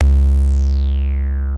Yamaha analog monosynth with 2 VCO, 2 ENV, 2 multimode filters, 2 VCA, 1 LFO
analog, bassdrum, cs-15, yamaha